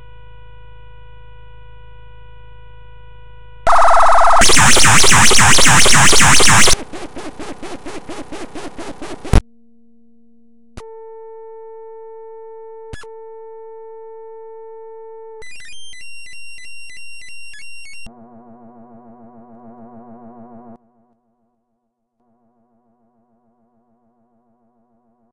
To be played loud to your granny when she has just dozed off in her rocking chair. Alternative use; chop up and use for glitches, noises, scratches, bleeps, radio sounds etc.
radio, loud, noise, processed, percussion, glitch, electro, scratch, bleep, pain